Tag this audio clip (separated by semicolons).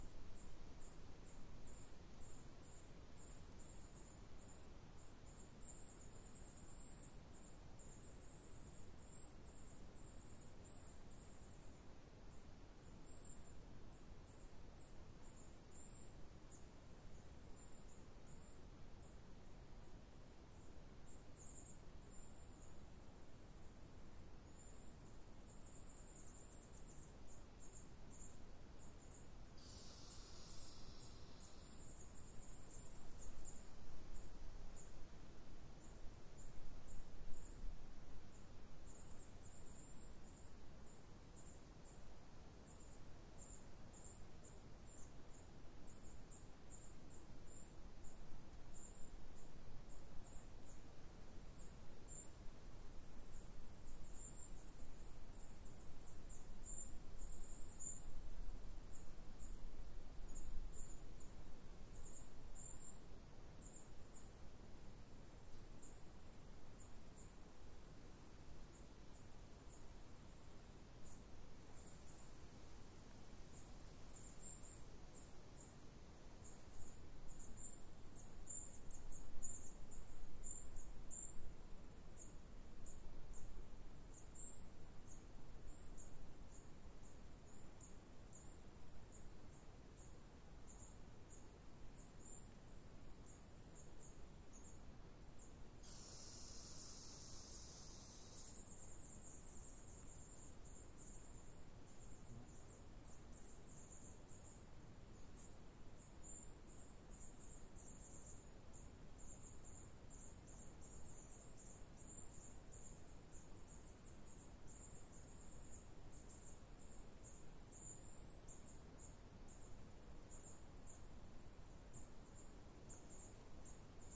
forest; field-recording; birds